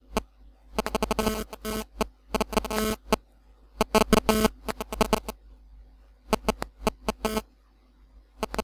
GSM Noise
My phone checking for e-mails over EDGE and irritating family members.
digital, communication, interference, speakers, noise, gsm